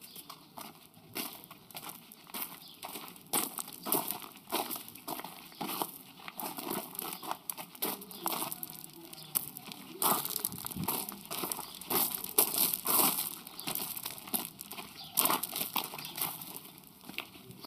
This sound is foot steps on gravel.
gravel, foot, steps